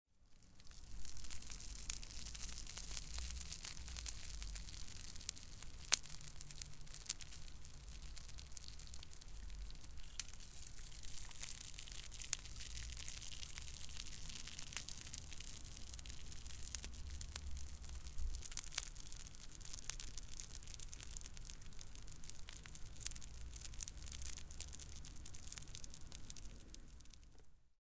A prawn cracker dipped in sweet and sour sauce! Tasty :-)